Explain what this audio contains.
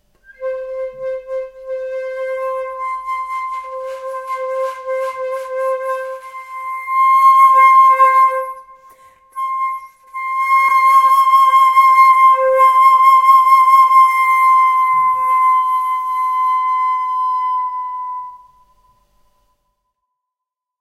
Flute Play C - 08
Recording of a Flute improvising with the note C
Acoustic, Instruments, Flute